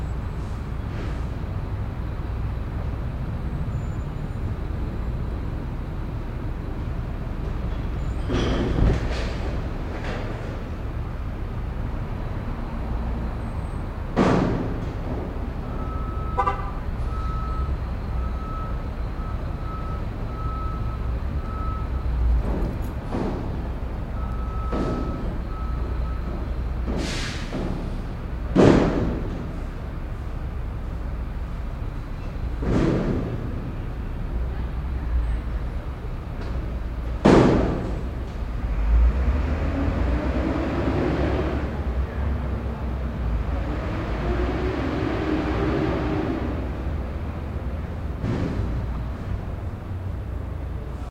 A truck stopped down the block and then there was some banging around and then the truck drove away. Recorded from my bedroom window.
ambient, bang, cityscape, city, road, truck, field-recording